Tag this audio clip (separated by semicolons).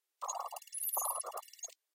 Spectral; Game-Audio